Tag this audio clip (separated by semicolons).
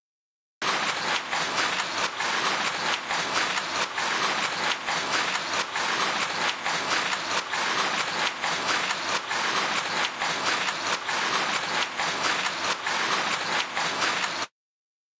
vietnam; sound-painting; machine; asia